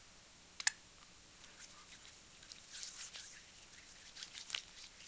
lotion hand
The sound of someone putting lotion in their hands and rubbing it together.
moist, together, dry, rubbing, lotion, hands